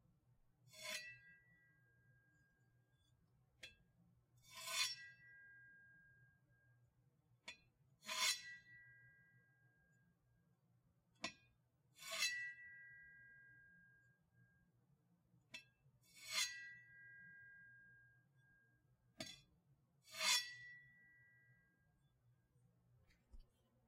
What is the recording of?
Shovel Pick Up
picking up shovel shhhhiing
up, shing, pick, shovel